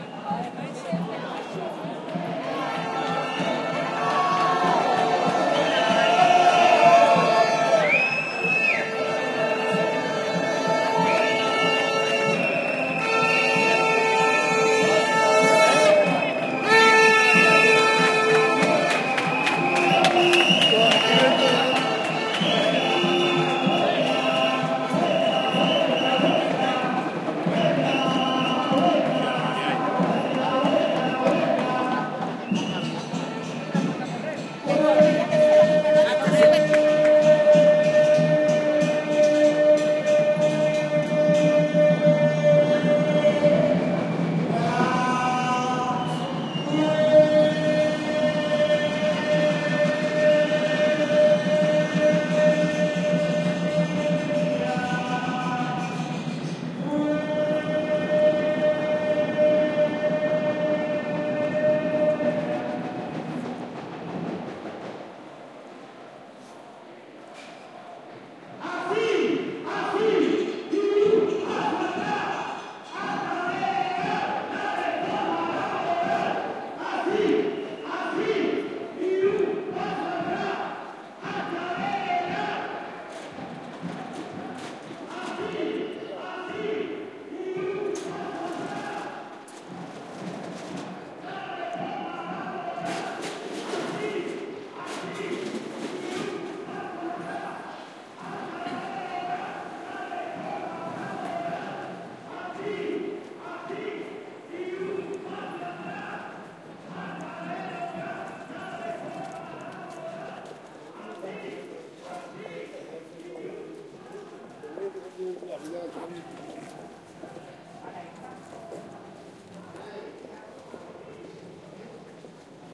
20120329 strike.06.closeup
people shouting slogans against the government during a demonstration. Recorded in Seville on March 29th 2012, a day of general strike in Spain. Soundman OKM mic capsules into PCM M10 recorder
capitalism, crowd, field-recording, manifestation, people, protest, rights, slogan, spain, spanish, street, strike, worker